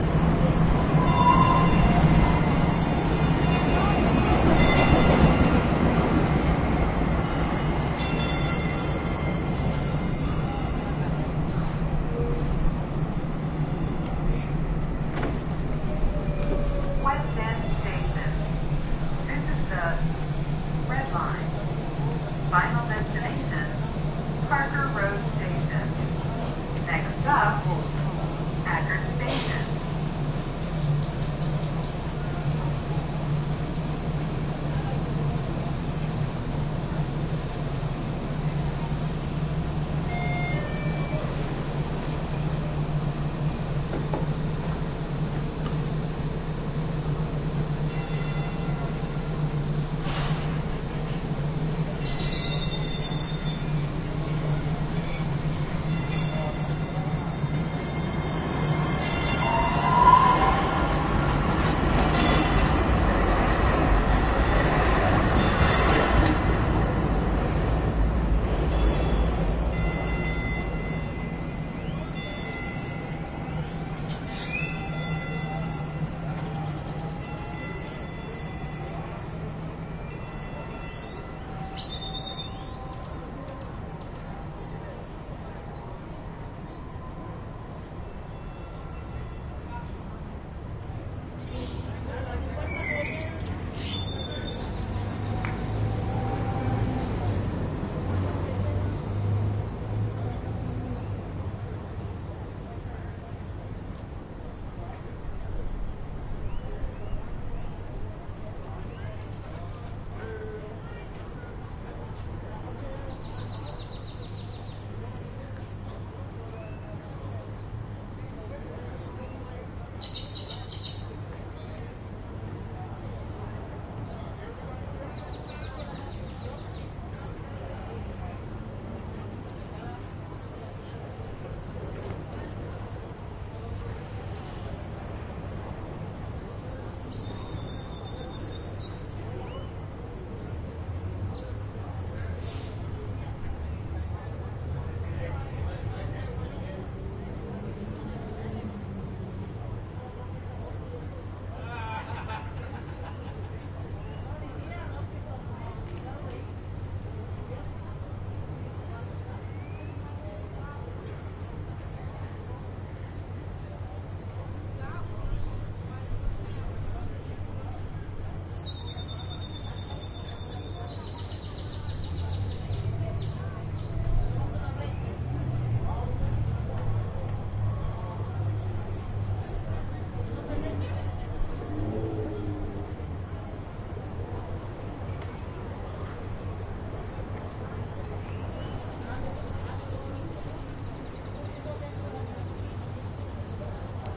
Part of the Dallas Toulon Soundscape exchange project. Recorded around noon on April 11th, 2011 at West End Station in Dallas. A train arrives and departs, the scene is noisy when the train arrives and quiet once the train leaves. People talk, birds chirp, traffic is heard in the distance. Temporal density of 4. Polyphony of 4. Busyness of location 3. Order-chaos of 4